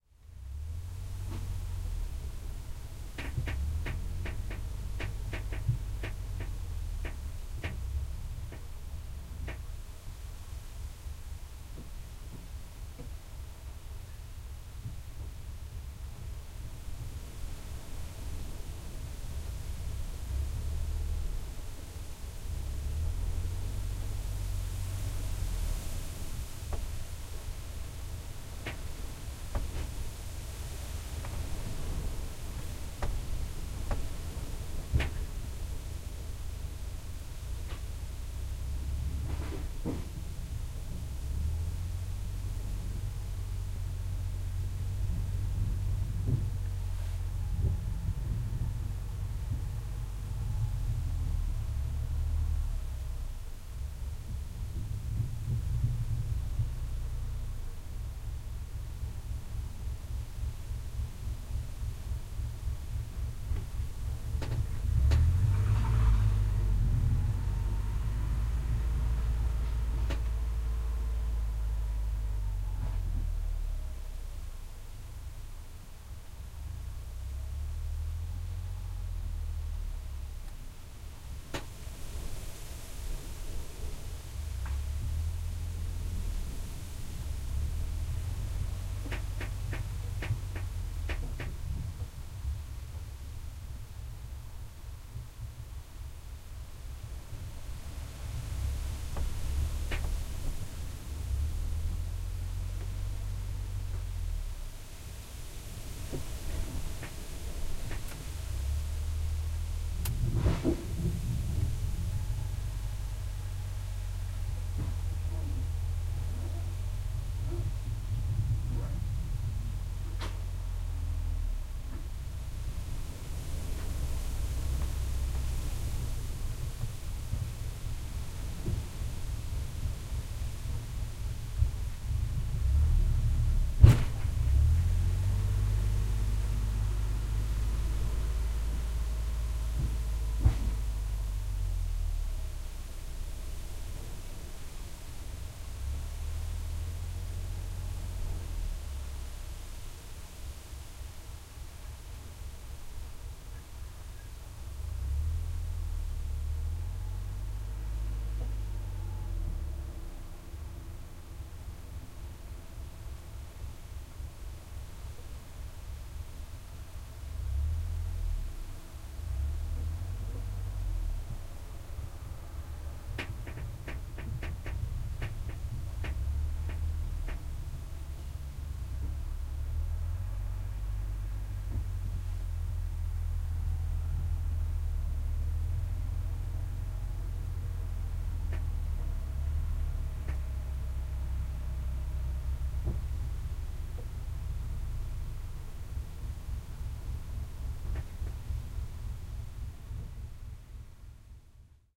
07.08.2011: eighth day of the ethnographic research about truck drivers culture. Kolding in Denmark (in the middle of field). Fruit farm near of the motorway. the swoosh of the grass and wind. Recording made from the truck cab perspective. In the background loading redcurrant.